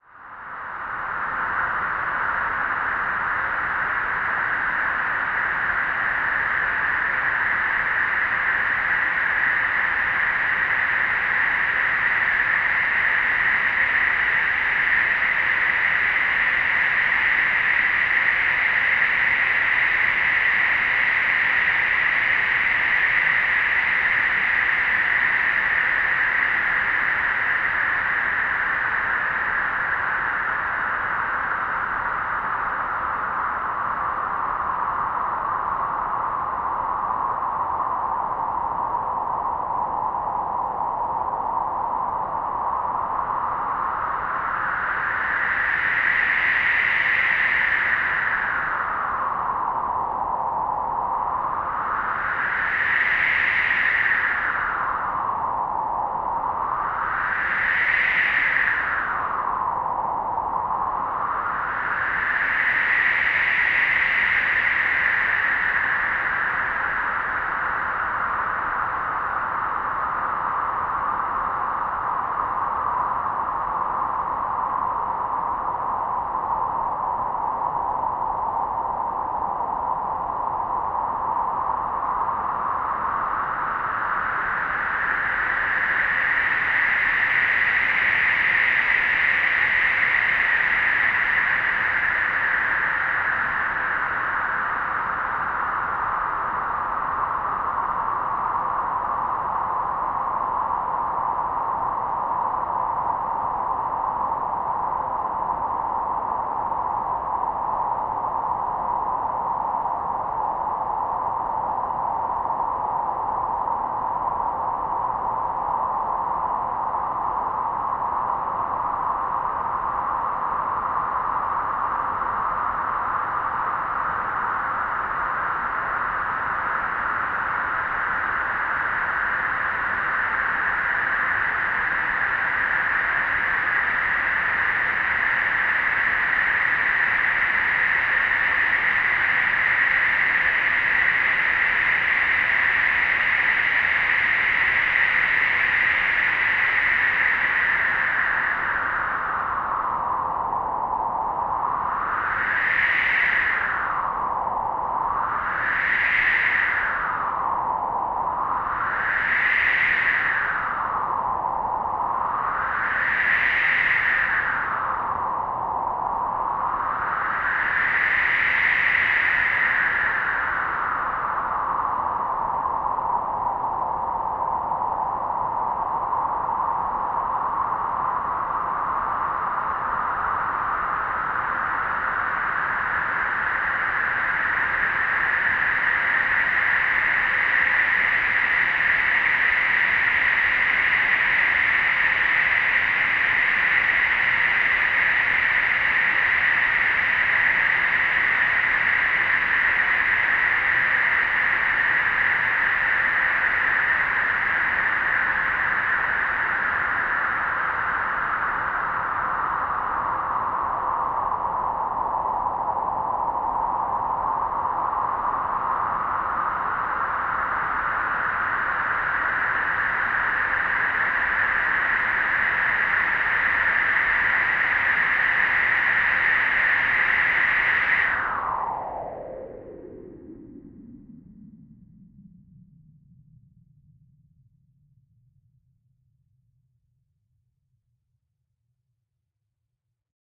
This is version 2 of the previous uploaded Arctic Winds, the 2nd LFO now manipulates the rate of the first LFO correctly. (I used 2 low frequency operators (LFO) on a Low Pass Filter (24db) on a generic pink noise sound with medium resonance settings, one of these LFO's was manipulating the other one with a random ramp, to make it sound more diverse and realistic. I put an aditional long envelope on the q (resonance) also, not to mention some chorus at a low tempo to make it kinda binaural with a stereo delay at a short time setting...) I hope you enjoy it!